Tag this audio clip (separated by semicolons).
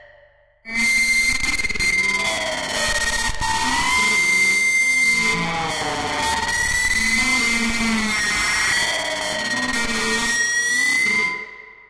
distortion; electronic